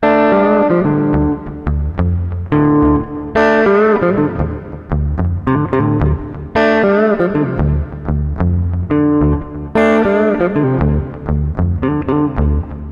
blues.loop03
a few looping blues bars played on Ibanez electric guitar>KorgAX30G>iRiver iHP120 /unos cuantos compases de blues tocados en una guitarra electrica con distorsion
blues, electric-guitar, loop, musical-instruments